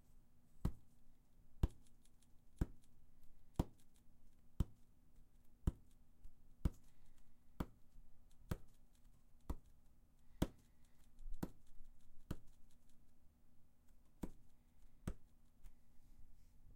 weak footstep on wood